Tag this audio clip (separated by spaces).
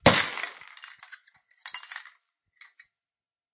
smashing shatter breaking smash